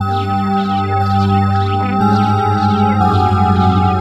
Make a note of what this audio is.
This synth loop is tattoed with sound of horror. Made with Am synth and Augur.
electronica, horror, loop, synth